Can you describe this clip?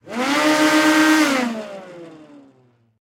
Hoover dirt
Re-mix of this::
Trying to make it sound like a synth hoover.